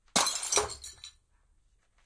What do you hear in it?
Bottle Smash FF187
1 medium pitch bottle smash, hammer, tinkling, extended
Medium-Pitch, Bottle-Breaking, Bottle-Crash